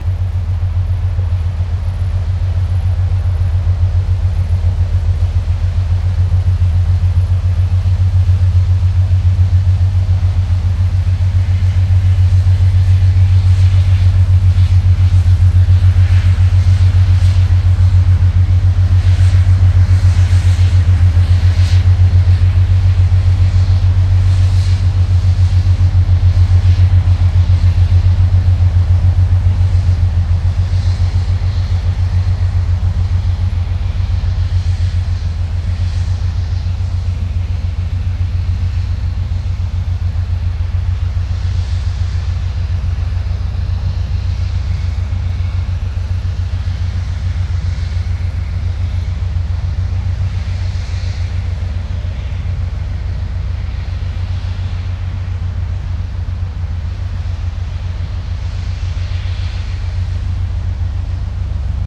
Big boat recording with Tascam DA-P1 and Sennheiser MKH-415T. Recorded on the 2nd of August 2005 in Utrecht.
boat, canal, field-recording, heavy, industrial, river, water